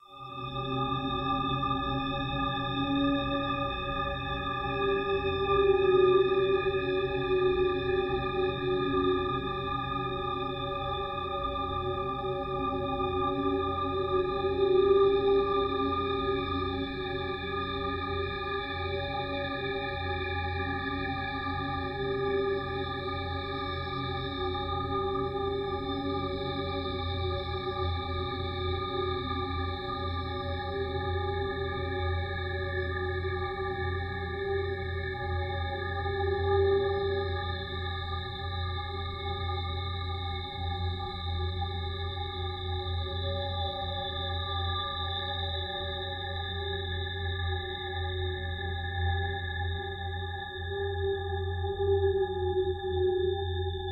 howling terror 2
another droning, somewhat howling sound